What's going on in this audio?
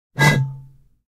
Bottle end blow whistle 2
Short blow into emty wine bottle
field, fx, human, recording, sound